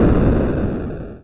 A distant bassy explosion.